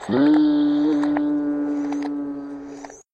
LACOUR Lena LPCIM 2018 nature
To make this sound I recorded on my phone the sound of a lady who walks in the heel on the street, and my brother who screams. Then I assembled the two sounds on the software. I changed the pace of the lady's sound in the heel, slowing it down. I changed the sound of my brother by making it more serious, and adding the effect "delay" and an echo.
ambiance, nature, street